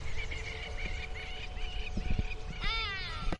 Birds in the city and how they move about.
birds, field-recording, ambience, city, ambient, background, ambiance